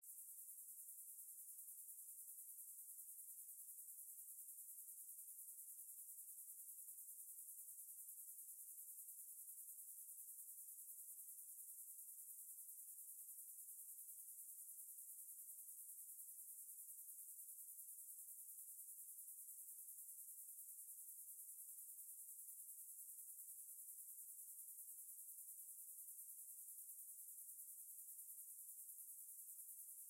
This is a top quality sound of dem dank crickets, created using a meticulously crafted effects rack in Ableton Live 10 Intro.
Ambience, Atmosphere, Country, Crickets, Forest, High, HQ, Jungle, Night, Quality